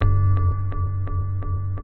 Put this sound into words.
Plunk Slice
Slice of sound from one of my audio projects. A plunky sound. Edited in Audacity.